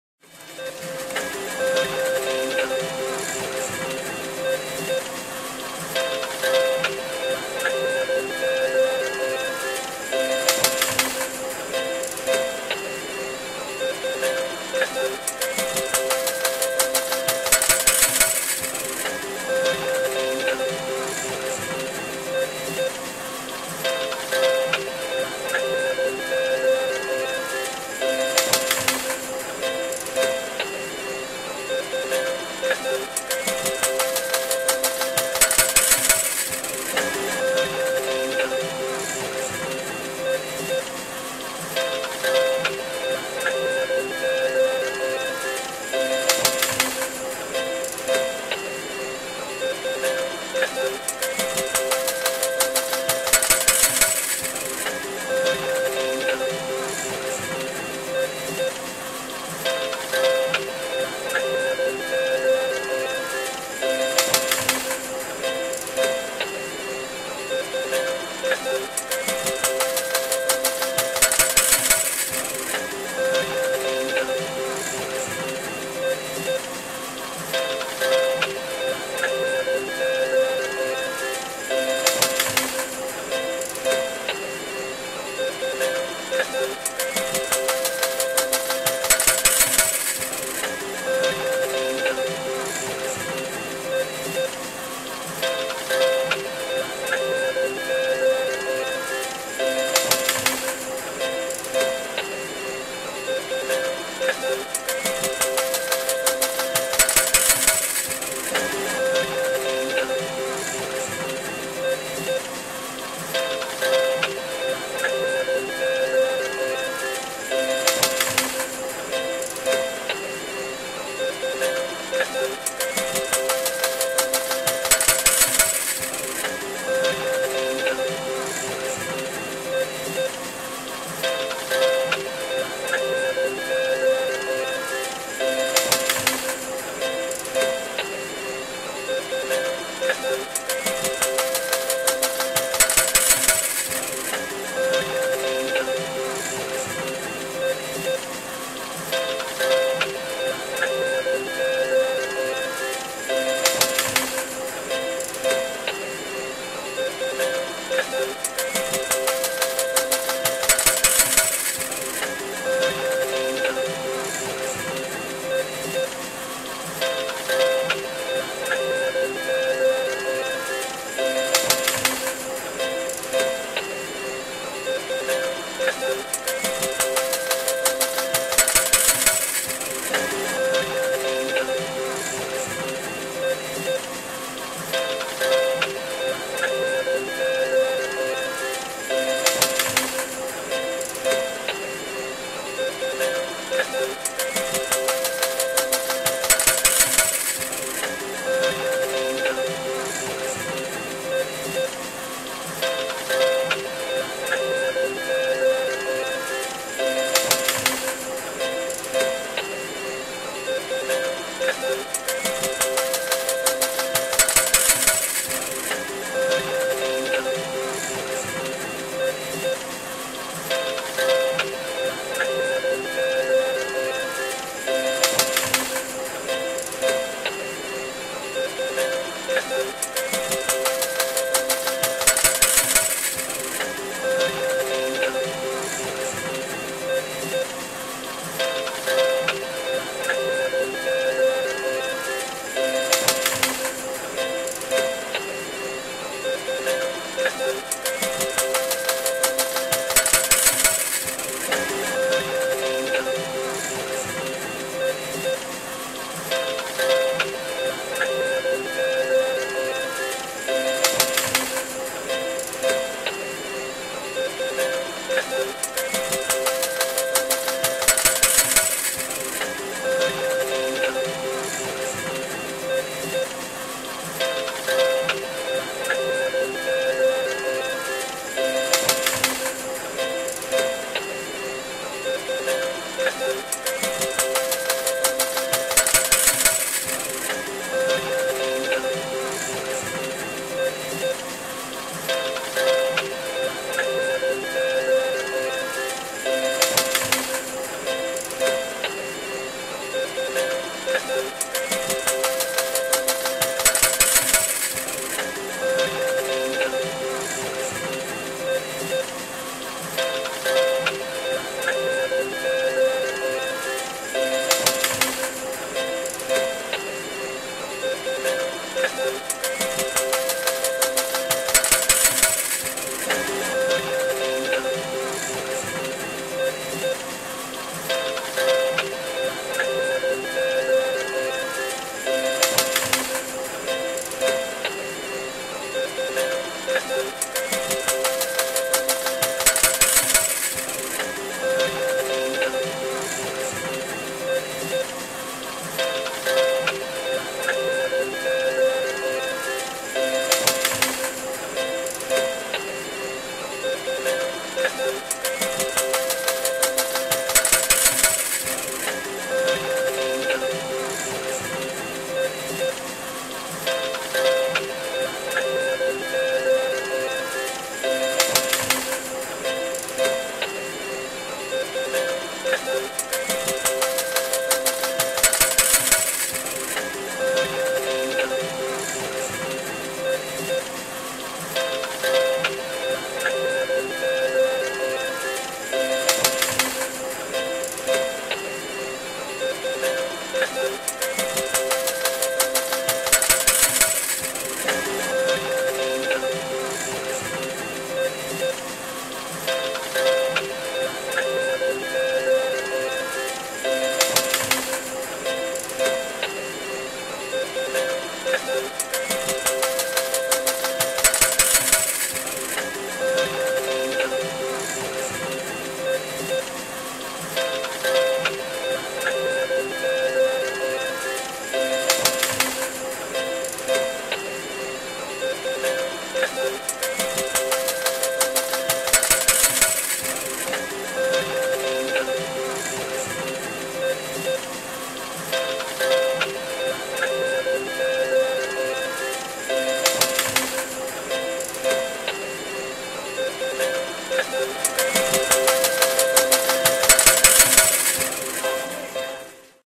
Casino slots sound effects
My latest sound effect of casino related sounds. This shows the real sounds of a Las Vegas casino, in the slot machine section.
Sounds effect created for Slotsify
slots, sounds